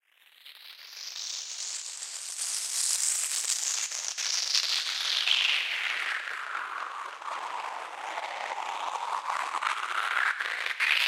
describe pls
This is a so called "whoosh-effect" which is often used in electronic music. Originally it´s a 6-bars sample at 130
It´s a sample from my sample pack "whoosh sfx", most of these samples are made with synthesizers, others are sounds i recorded.
fx, woosh, swash, electronic, music, synthesizer, whoosh, sfx, swoosh, swosh, swish